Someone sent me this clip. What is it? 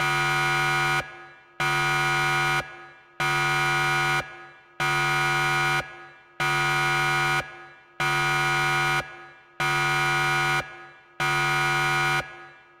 An alarm I made in Sylenth by distorting a sine wave mixed with a triangle wave. I then notched stuff out in EQ, distorted it further and added reverb.